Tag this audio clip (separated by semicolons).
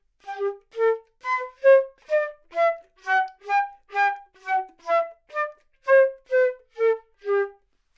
flute; Gmajor; good-sounds; neumann-U87; scale